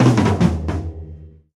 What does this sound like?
tom rolldown
a percussion sample from a recording session using Will Vinton's studio drum set.